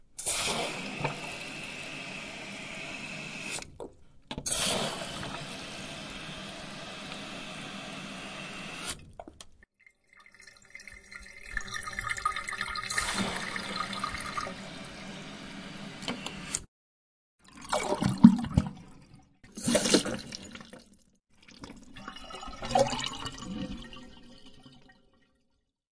Toilet reservoir tank
Miked at 6" distance.
Mic suspended in open reservoir tank of toilet bowl, aimed at drain at base of tank.
spill, toilet, flush, water